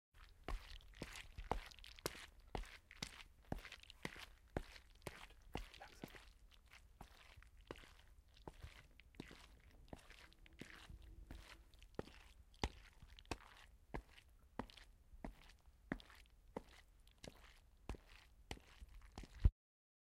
Wet Footsteps
wet, foot, walk, steps, floor, ground, feet, footsteps, hardwood, walking, step